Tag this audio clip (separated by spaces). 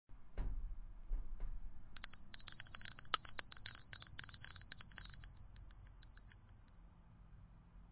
Bell ring ringing